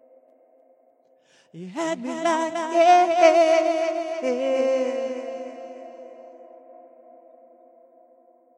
had me like yeah
vocal i did for a song of mine . love to hear what you did with it
vocal, voice, male, sexy